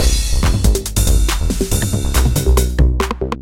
tb303-loop
A loop using two TS404 plugin instances, both set to emulate the TB303 bass synth, and a drum loop.
drum-loop, tb303